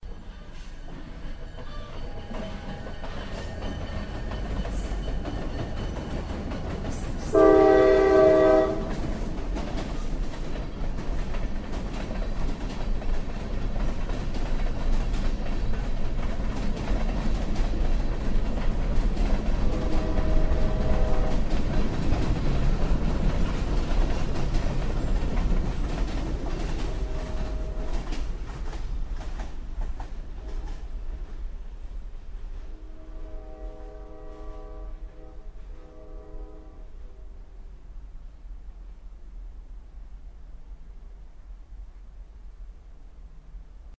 Diesel Locomotive moving-20 cars-whistle blast
Diesel locomotive moving rather slowly with whistle. About 20 cars in tow. Whistle blows nearby and in distance.